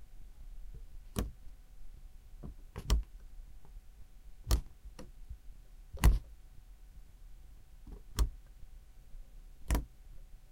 Ceiling Light Switch on 1990 Volvo 240 Sedan
The ceiling light switch being flicked on a 1990 Volvo 240 DL sedan.
1990s, vintage, vehicle, volvo, 1990, volvo-240, car, cars, old-car, light, old-cars, 90s, switches, 1990s-car, light-switch, automotive, 240, antique-car, switch, nineties, vintage-car